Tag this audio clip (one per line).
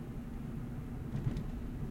automobile
drive
engine